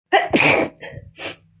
Another sneeze of mine made with the same process as the previous one. I hope you find it useful.
woman-sneeze, loud, chills, sneeze, allergy, cold